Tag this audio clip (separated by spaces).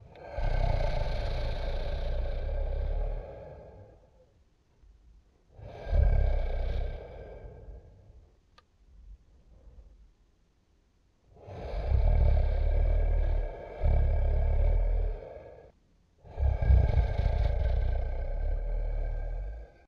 moan 3 dragon 0 dying etc